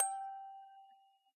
clean sol 4
eliasheunincks musicbox-samplepack, i just cleaned it. sounds less organic now.
clean, metal, musicbox, note, sample, toy